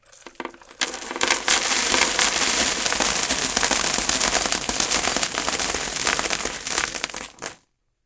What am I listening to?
many coins
gold, cash, coin, money, coins